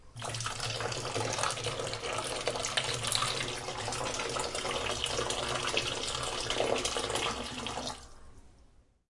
Water flowing from a faucet over a steel sink with some dishes and silverware inside.
The recorder, a Tascam DR100 mkII, was placed at half meter away from the sink.
dish-washing, water